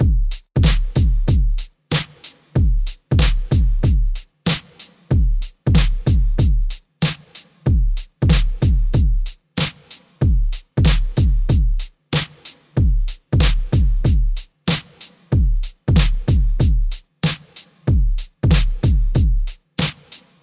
HH loop 94bpm
hip hop drum loop by Voodoom Prod created with Logic Pro
hiphop, loop, beat